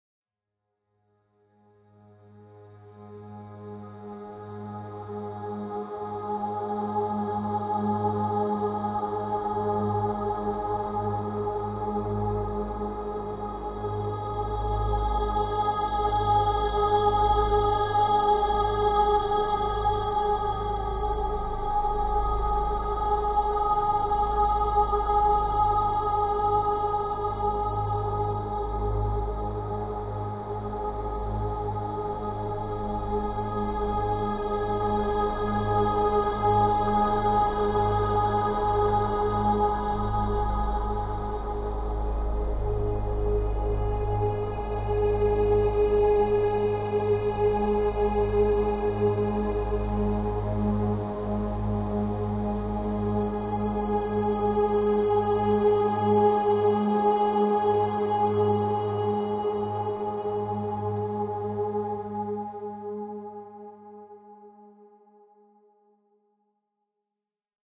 An ethereal sound made by processing a acoustic & synthetic sounds.